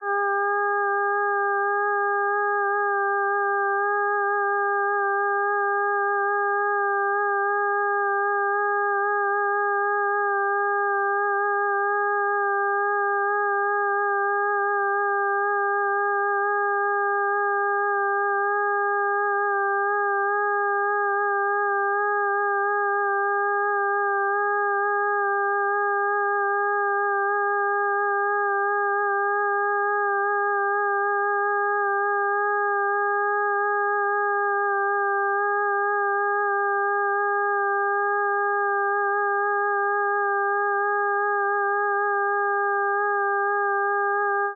This is an (electronic) atmosphere processed in SuperCollider
processed, supercollider